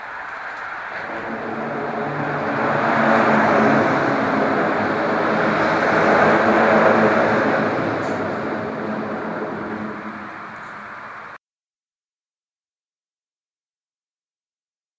This is a recording of cars travelling over a bridge with a open steel mesh on it's surface.
lowhum, steeldeck